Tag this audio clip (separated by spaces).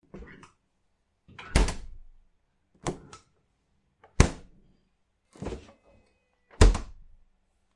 fridge electric kitchen